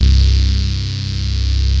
SYNTH SAW BASS